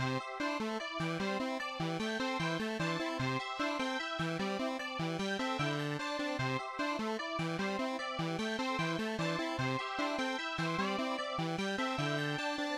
Simple Melody Retro Loop 01
asian, loop, melody, retro, simple